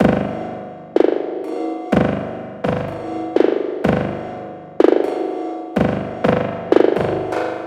delayed beat with snare 4 4 125bpm blobby type kick fizzy hats 3456-3476
beat with snare 4 4 125bpm blobby type kick fizzy hats